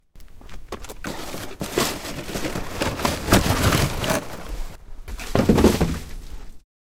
Cardboard, you go to the trash bin. Goodbye.
Recorded with Zoom H2. Edited with Audacity.
box, cardboard, destruction, litter, paper, tearing, trash, trash-bin, trashbin